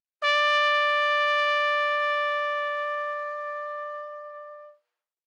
Trumpet - D5 - bad-dynamics-decrescendo
Part of the Good-sounds dataset of monophonic instrumental sounds.
instrument::trumpet
note::D
octave::5
midi note::62
tuning reference::440
good-sounds-id::1142
Intentionally played as an example of bad-dynamics-decrescendo
trumpet, neumann-U87, multisample, single-note, good-sounds, D5